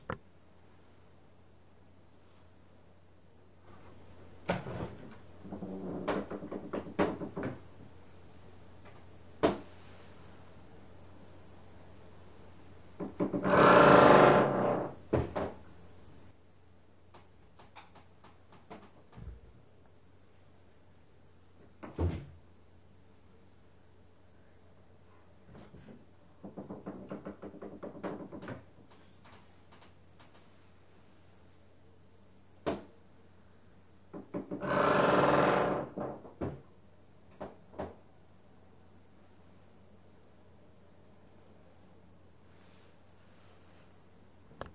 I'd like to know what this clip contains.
porta de guarda roupa rangendo.
creak, door, porta, rangido, screech